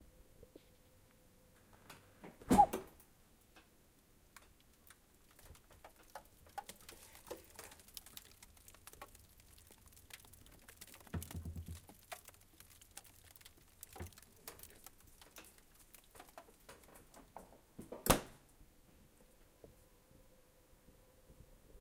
Opening the Freezer
A recording of the freezer door opening. I can hear the frozen packages inside slightly crinkling.
Recorded with Zoom H5 (XYH-5)
October 23, 2018
close, closing, cold, crackling, door, doors, freezer, fridge, frozen, open, opening, refrigerator